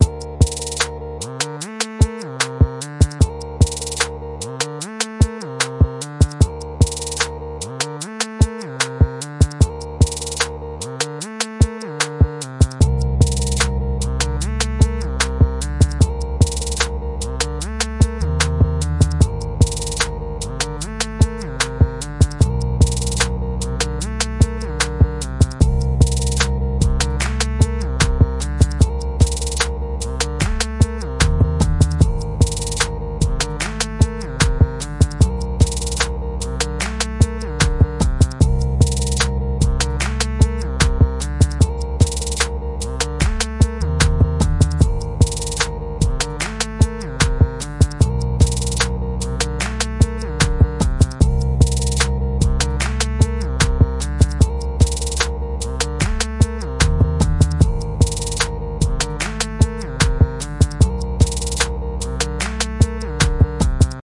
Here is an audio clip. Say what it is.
This is a Western Theme i made for everyone to use in there Video Games As Long As they Support Me that it Fine